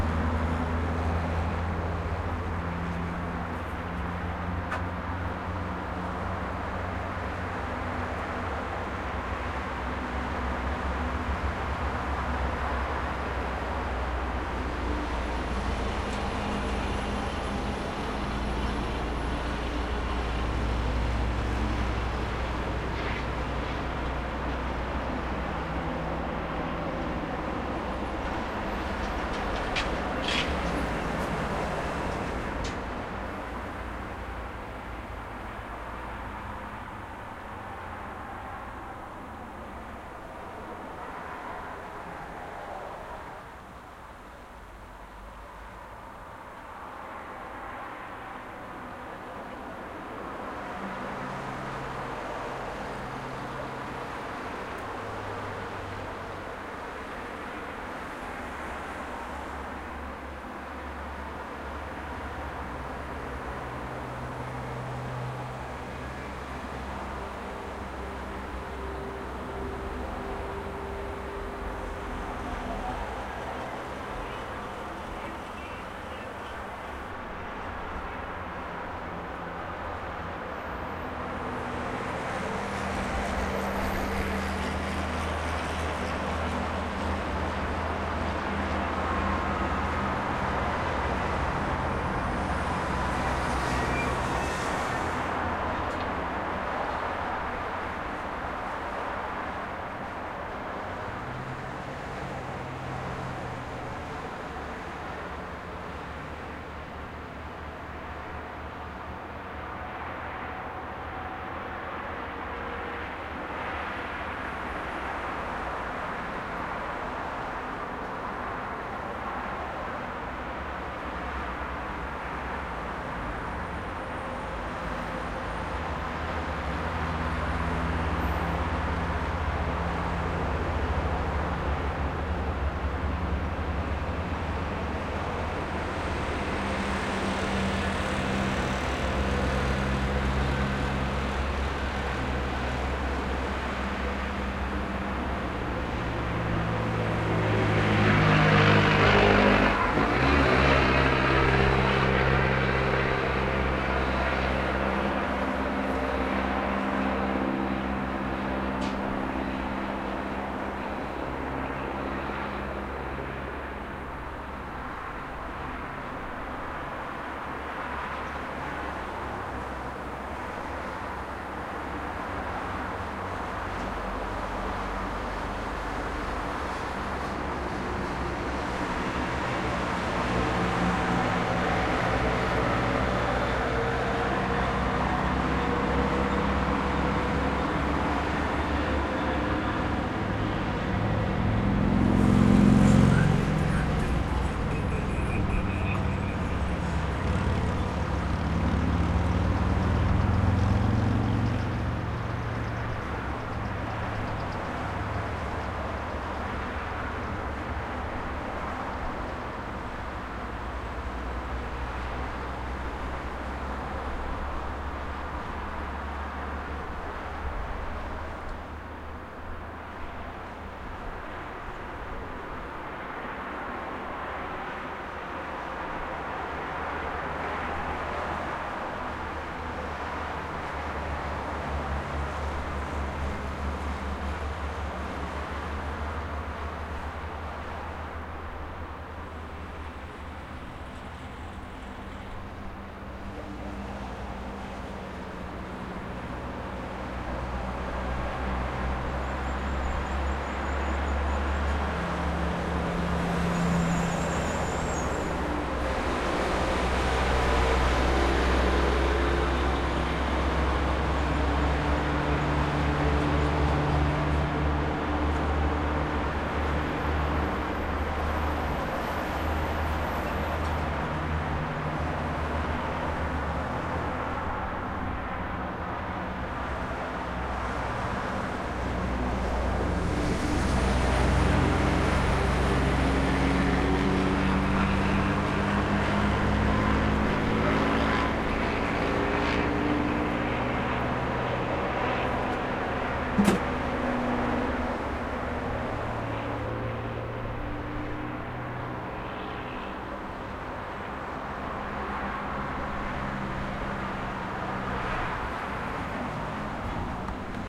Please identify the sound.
Night time Highway
recording of a highway during the evening
cars, field, h4n, recording, zoom